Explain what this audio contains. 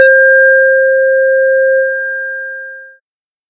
Alarm Peep
Created as a sound alarm.
alarm, digital, synthesizer